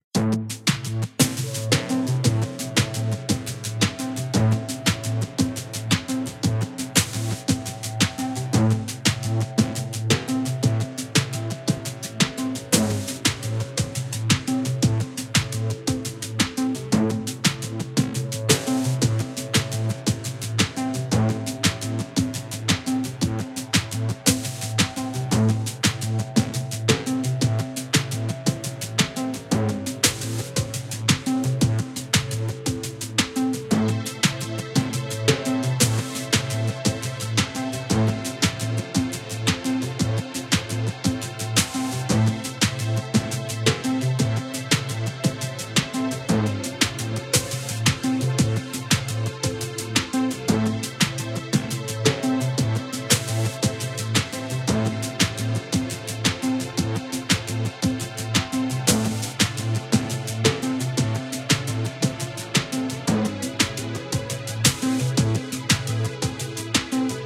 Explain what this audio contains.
Electronic future loop.
Synth:Ableon L,Silent1,Synth1,Kontakt.
acid, bounce, club, dance, dub-step, effect, electro, Electronic, future, house, loop, minimal, original, panning, rave, techno, track, trance